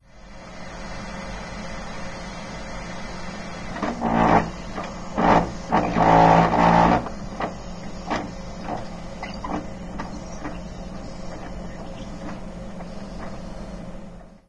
hydraulic problem
Sounds produced while transporting the water in pipes. Recorded near the wall in adjacent room to the old bathroom.
water-transport, dr-100, hydraulics-of-water-transport, bathroom